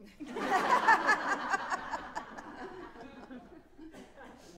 Small Crowd Laughing
A small crowd or party of people laughing in an open room.
laugh, foley, crowd